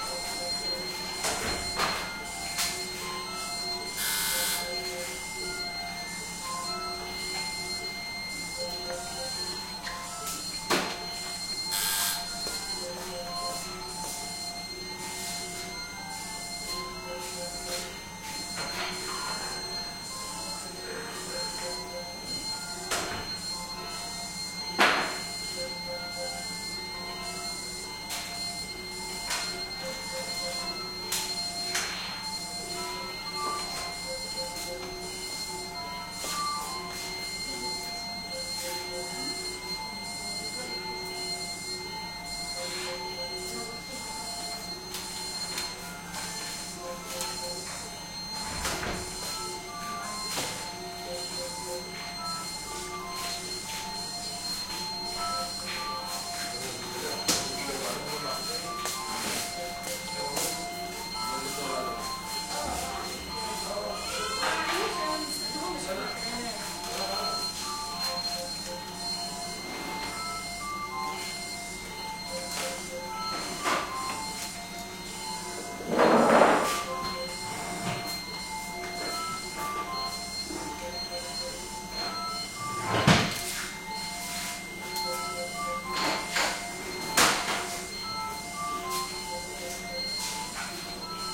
hospital prenatal room beeps and constant tone and nurse activity2 Gaza 2016
medical, room, hospital, care, babies, intensive, prenatal